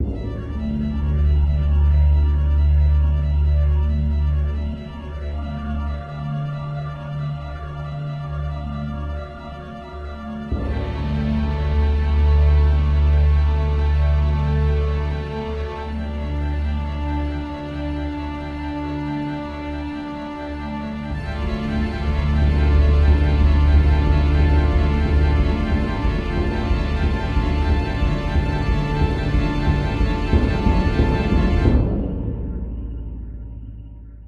Tension building intro
A little tension build-up I made for a friend of mine
Classical, Intro, Orchestral, String, Strings, Tension, Timpani, Violin